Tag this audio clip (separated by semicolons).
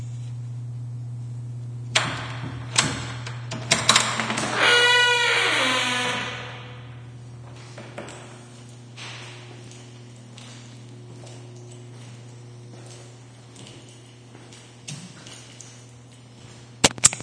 bathroom; breathing; creak; door; echoing; huge; room; scarey; stall; walking